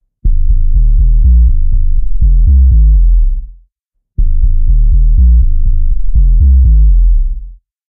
Loop Bassline 122 bpm
122, bassline, bpm, loop